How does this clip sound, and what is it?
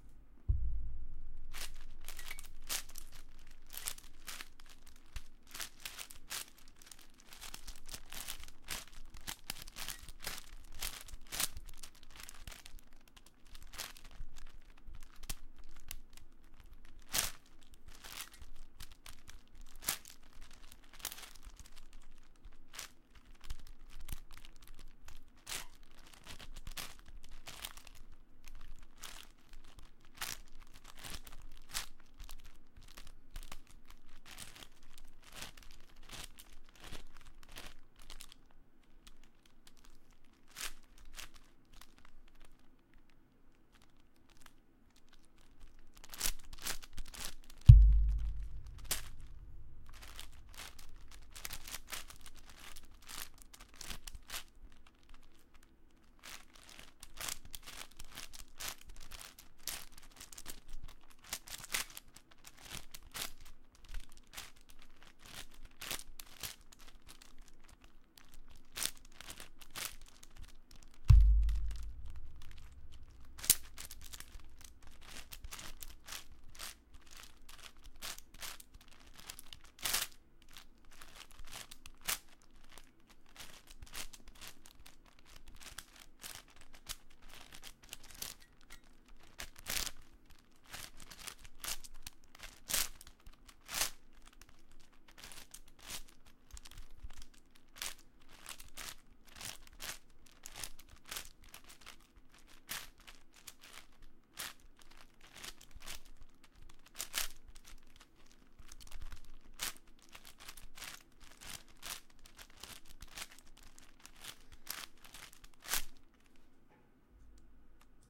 Me doing a quick solve of a 9x9x9 Cube
Rubikscube, Rubiks, Crunch, Cube, Puzzel, Click